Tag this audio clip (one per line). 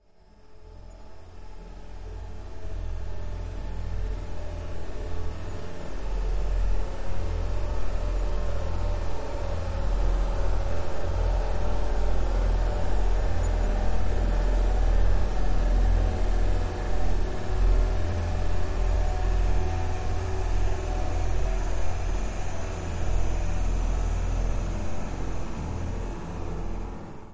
ambient
film
intense
tense
tension